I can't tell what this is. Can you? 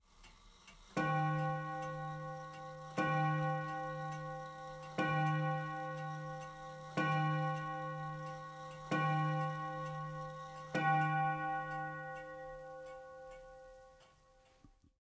Church style bell, recorded from an antique grandfather clock
chime, clock, bell